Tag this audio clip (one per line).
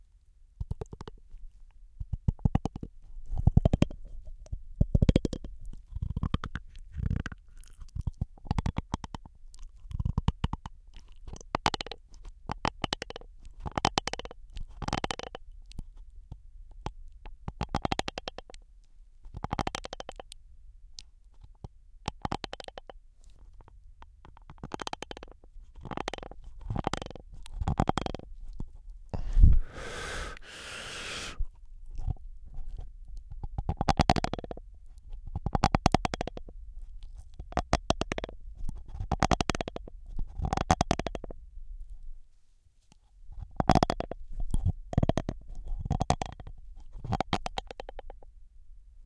shiver
unpleasant
teeth
grinding